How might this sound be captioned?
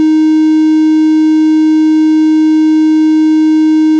A! A basic waveform!